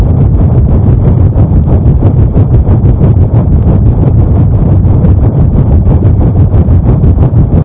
engine damaged
This could be used for a throbbing cranking starship engine, or, if you prefer, a washing machine. All sounds created with Audacity.
loop, engine, washing-machine, sci-fi, spaceship, damage